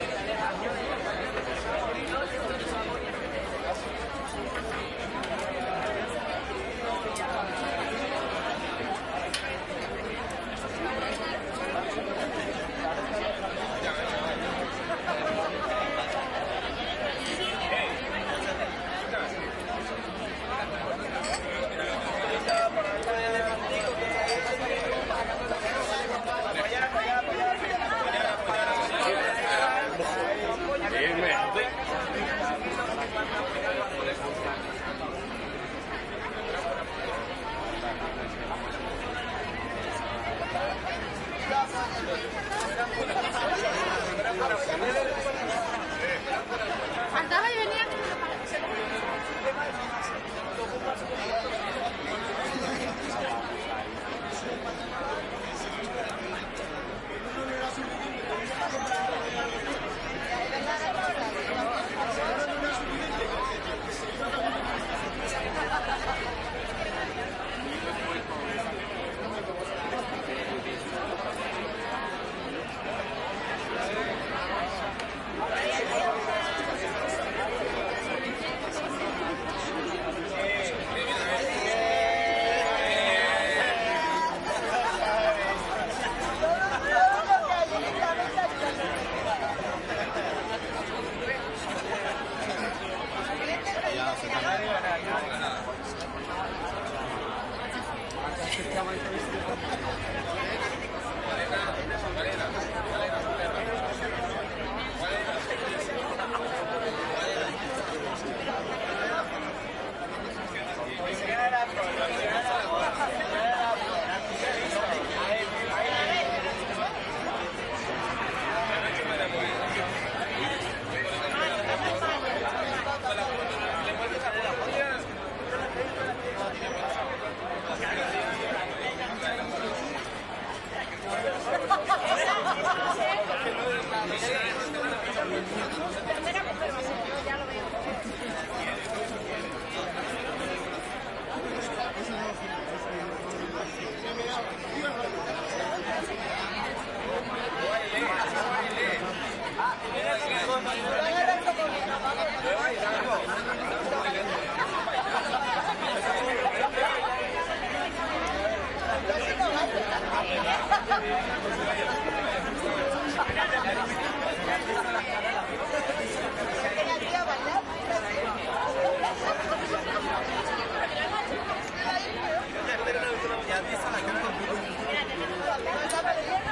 crowd ext medium close active walla spanish restaurant Madrid, Spain
spanish, restaurant, Spain, ext, active, medium, close, walla, crowd